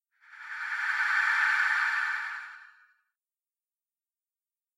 A huge monstrous alien, fresh blood glistening on his needle-like teeth quietly pants as he stalks towards the intergalactic hero. If this describes your sound needs, you've found the perfect sound! Made with a metronome click paulstreched, made in Audacity. If you like what you hear, please make sure to rate! Enjoy!